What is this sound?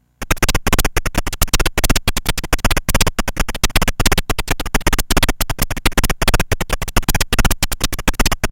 Atari FX 07
Soundeffects recorded from the Atari ST
Chiptune, Atari, Electronic, Soundeffects, YM2149